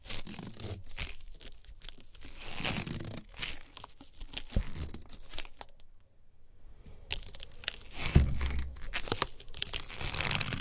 Stretching a rubber band